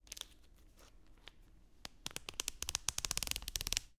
open a Ziploc bag rather gently.